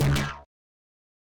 A cartoony twang made from a hot XY recording of a rubberband with some distortion and delay. Coming on Wedsenday is a Jaw Harp (aka Jews Harp) that I am going to use to make some really twangy noises.